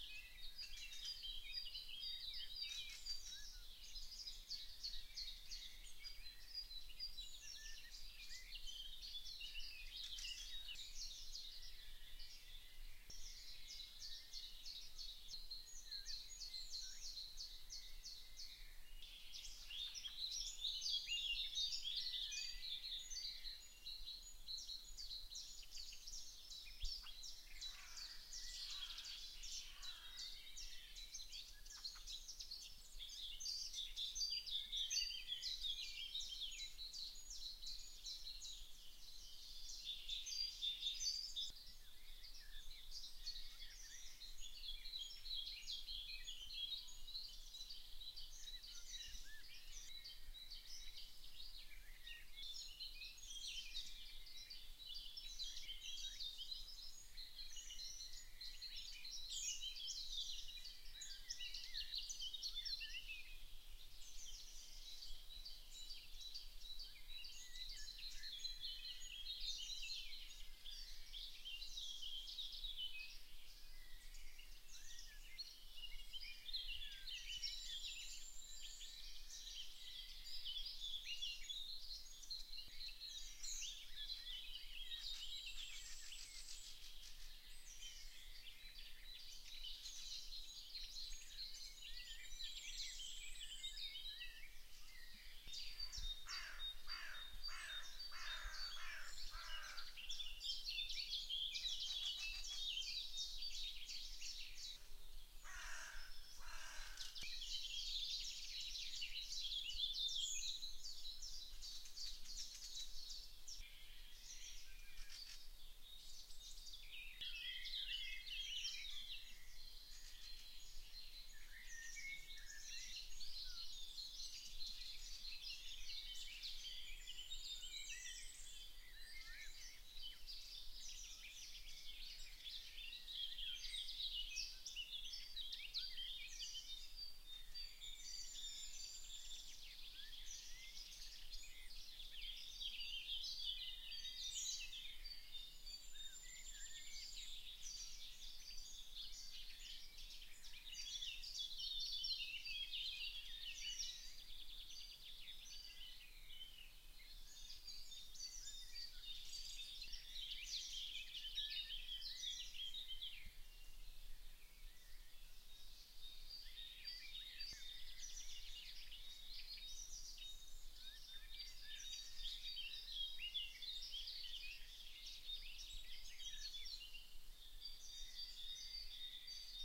BIRDS OF WIBBECKE
Birds singing early in the morning at springtime.
Recorded in a small village in Germany with a Rode NT3 micro.